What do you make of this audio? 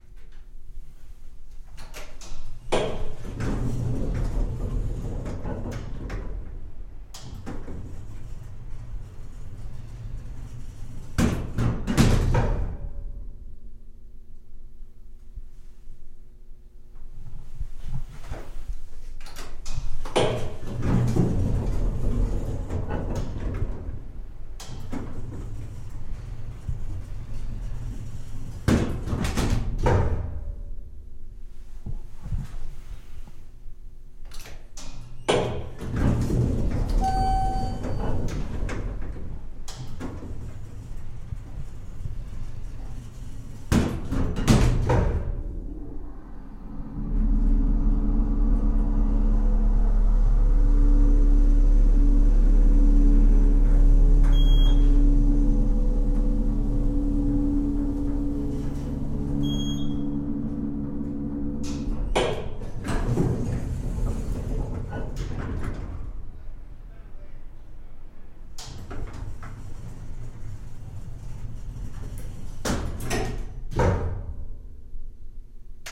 Elevator door opening and closing, elevator ascending two floors. Recorded at Shelby Hall, The University of Alabama, spring 2009.
elevator, field-recording, motor